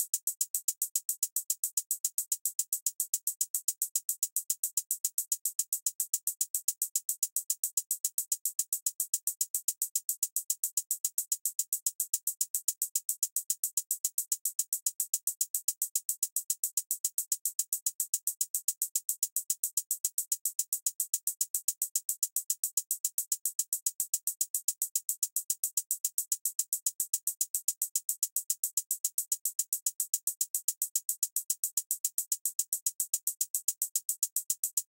Trap Hihat
Hihat, 110 BPM
Dope, HIHAT